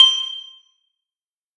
anvil-short
An anvil/metal on metal sound created by resampling and adding lots of EQ (dynamic and static) and some reverb to Incarnadine's oom 1 sample.
metal, bright, processed, percussion, anvil, industrial, metallic, hard